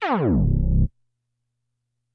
Pieces to create a tape slowdown effect. Recommend combining them with each other and with a record scratch to get the flavor you want. Several varieties exist covering different start and stop pitches, as well as porta time. Porta time is a smooth change in frequency between two notes that sounds like a slide. These all go down in frequency.